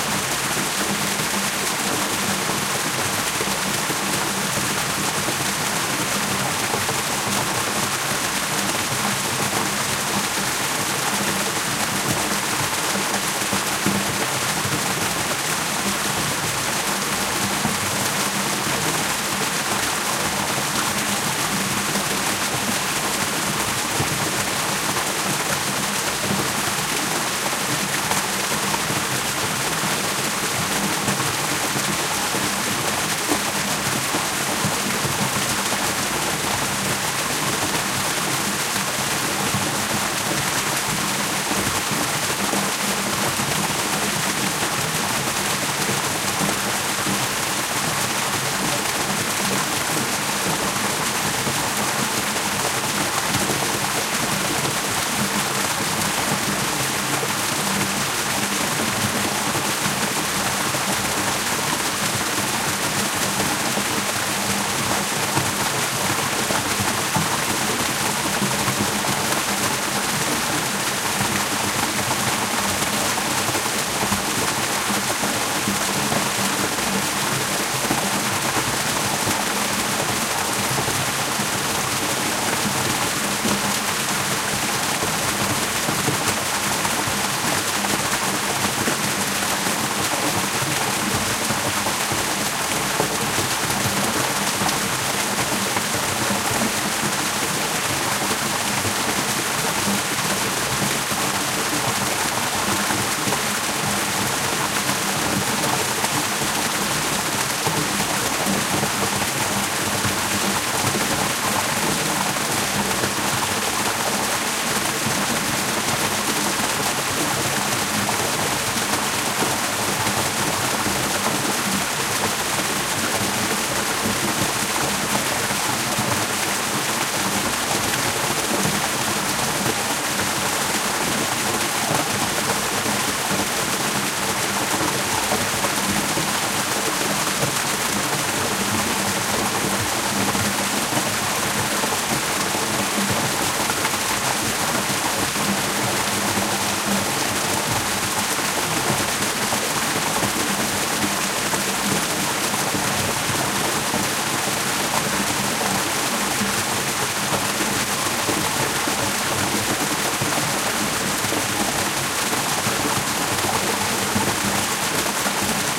water cascading out of retreating Solheimajokull glacier, Southern Iceland. Shure WL183, FEL preamp, Edirol R09 recorder
climate-change, field-recording, flickr, glacier, global-warming, iceland, nature, water, waterfall